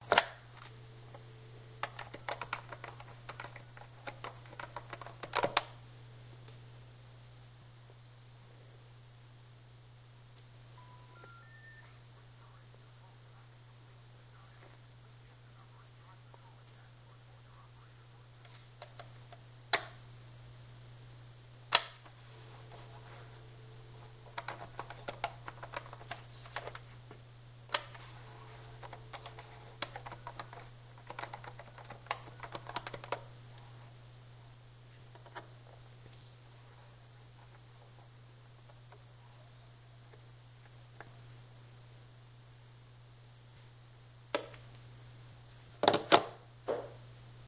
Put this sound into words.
Land line: Lift earpiece off receiver, dial the wrong phone number. If you listen closely you can hear the earpiece broadcast the 3 tone error message. Hang up, dial the right phone number, after the call ends, receiver clicks because the other person finished the call. At the end, place the earpiece back on the receiver.